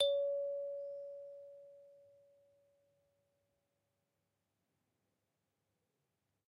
I sampled a Kalimba with two RHØDE NT5 into an EDIROL UA-25. Actually Stereo, because i couldn't decide wich Mic I should use...
sound, nature, african, kalimba, note, unprocessed, short, pitch, db